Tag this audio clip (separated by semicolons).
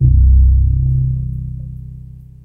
moog; synth; bass; micromoog; analog